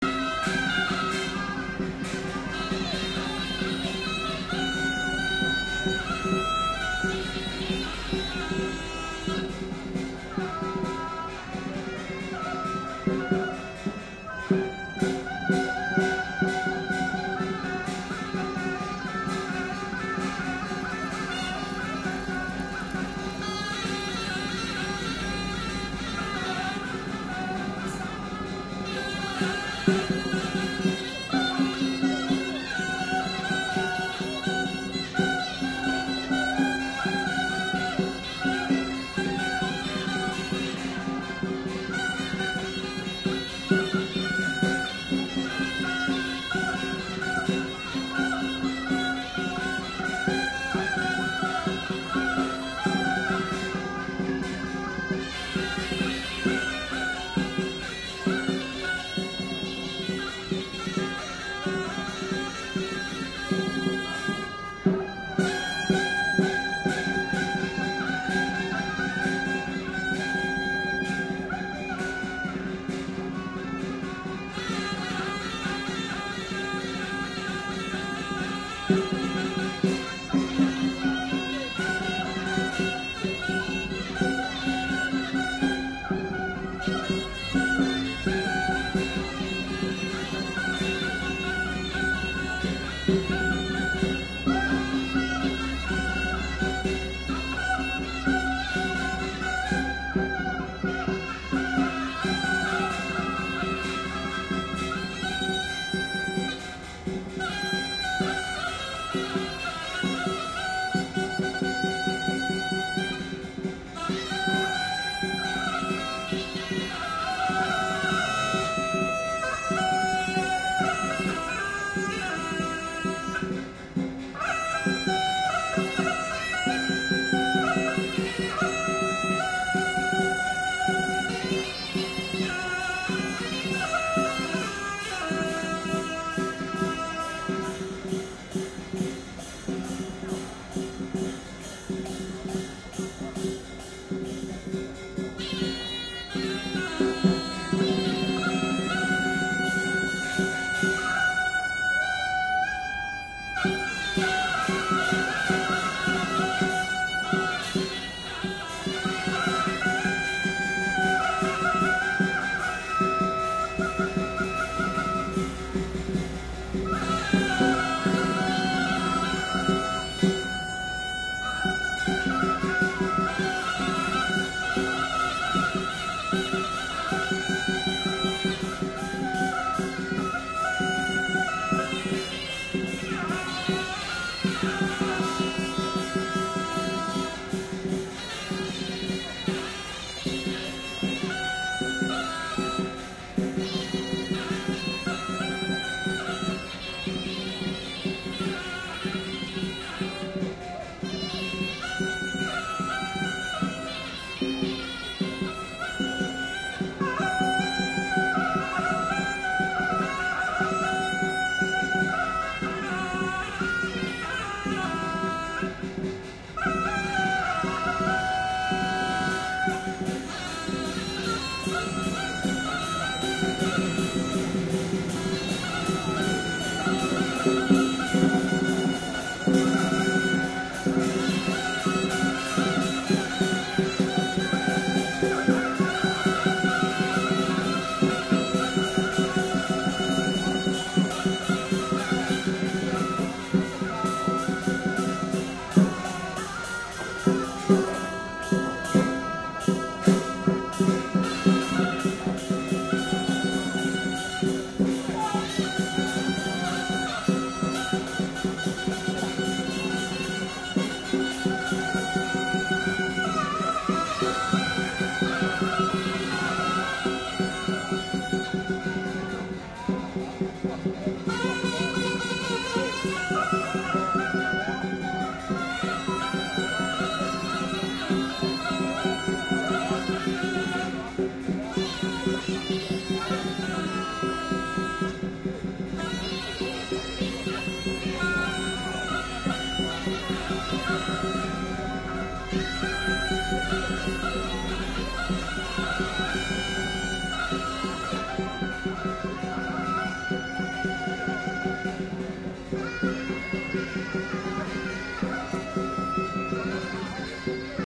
Chinese Ritual music for ghost festival 1
Recorded at a ritual performed in a public housing estate during Chinese Ghost Festival. I guess it is to pray for the prosperity of the area. Recorded on iPhone SE with Zoom iQ5 and HandyRec.
Chinese-ritual-music, field-recording, Ghost-festival, Hong-Kong, Suona